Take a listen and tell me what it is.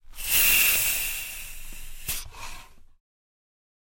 A bicycle pump recorded with a Zoom H6 and a Beyerdynamic MC740.